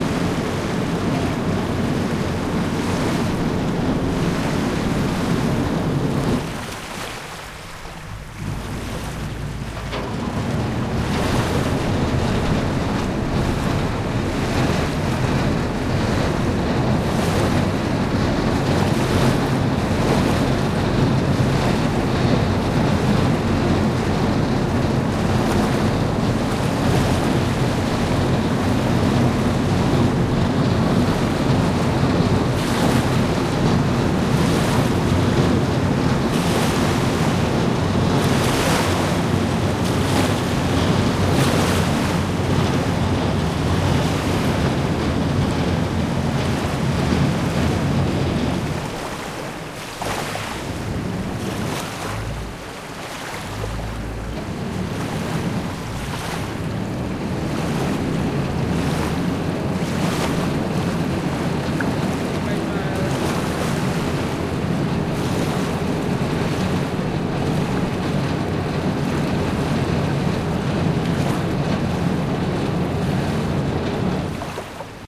Duna River Ship Beach ZOOM0003
River Ship Beach
Ship, Peoples, beach, soundscape, field-recording, Humans, waves, Peaceful